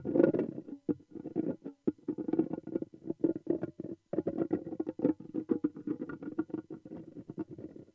rubbing and scraping noise on a leather of a jdembe.
I'm interest about what you do with this sort of sound.
strange, scraping